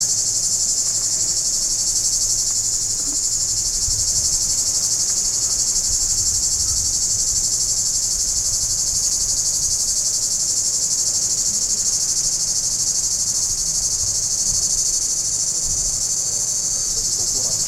A Cicada is singing. Stereo recording. A Sony Handycam HDR-SR12 has been used. The sound is unprocessed and was recorder in Greece, somewhere in Peloponesse.
cicada, field-recording